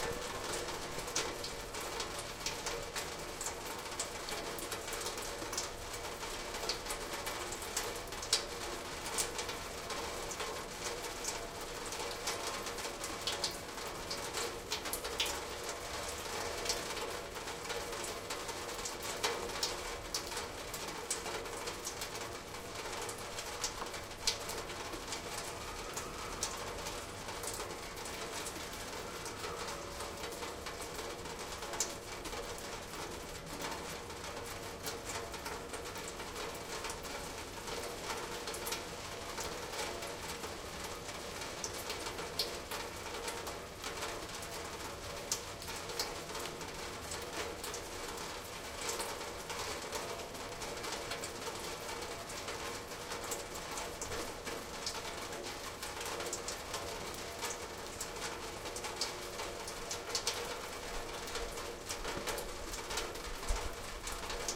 Rain Gutter-SPB-038
Rain falling around and through the downspout of a rain gutter in the courtyard of the apartment building where I stayed in St. Petersburg. There were 3 or 4 downspouts from which I made a total of 7 recordings. September 3, 2012, around 4 PM. Recorded with a Zoom H2.
rain, Saint-Petersburg, Field-recording